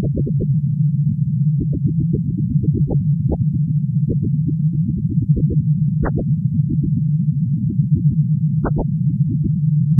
Read the description on the first file on the pack to know the principle of sound generation.
This is the image from this sample:
processed through Nicolas Fournell's free Audiopaint program (used the default settings).
image, computer, sound-to-image, iteration, synthetic, image-to-sound